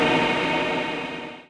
These set of samples has been recorded in the Batu Caves temples north of Kuala Lumpur during the Thaipusam festival. They were then paulstretched and a percussive envelope was put on them.
BatuCaves, Paulstretch, Thaipusam